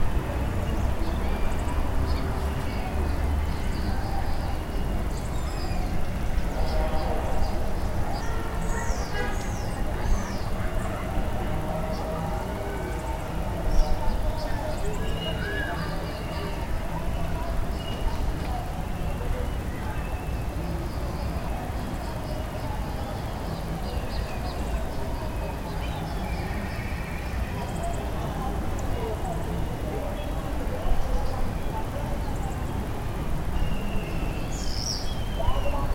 A 35 sec; recording made in january 2006 in the courtyard of Alliance-Ethio-Française in Addis Abeba. Birds, buzzards, shoutings and the distant sound of city traffic. Recorded cheaply with an Archos mp3 player.